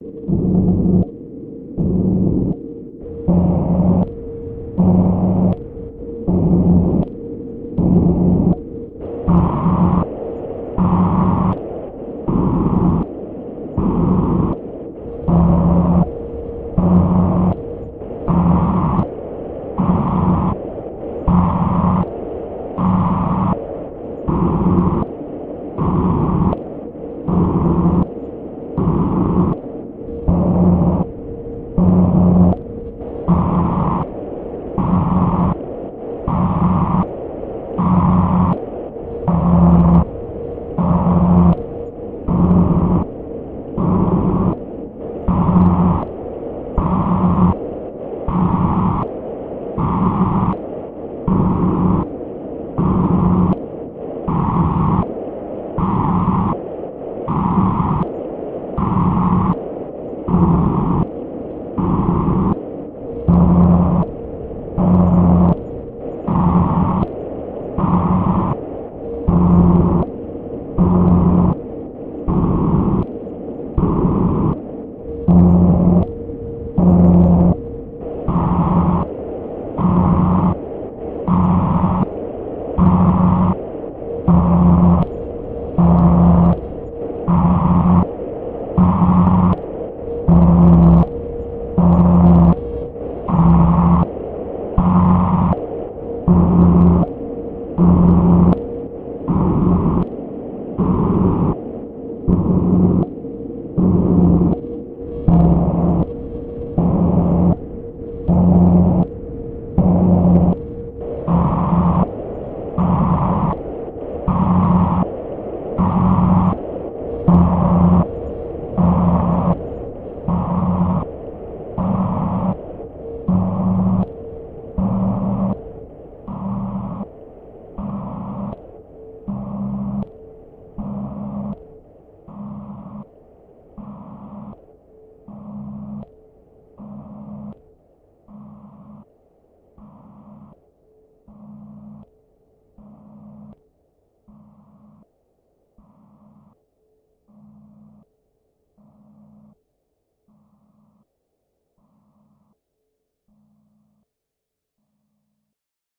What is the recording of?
VIRAL FX 02 - C5 - PULSATING RANDOM NOISE BURSTS with DELAY
Noise bursts created with a slow stepping random LFO with some delay and distortion. Created with RGC Z3TA+ VSTi within Cubase 5. The name of the key played on the keyboard is going from C1 till C6 and is in the name of the file.